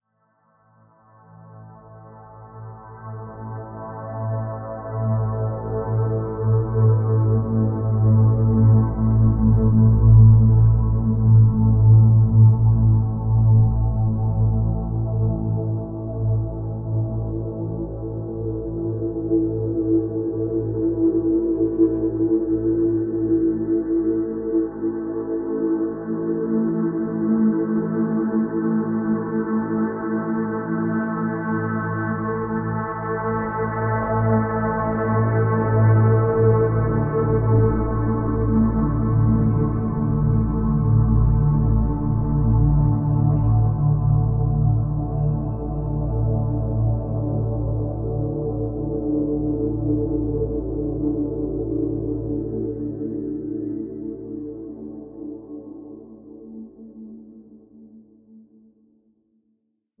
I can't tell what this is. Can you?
A drone I did in MetaSynth, has some nice filter sweeps.